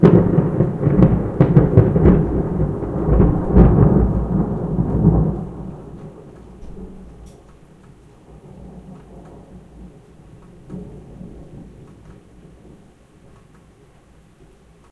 STE-036-nice thunder
Recording of a storm rumbling.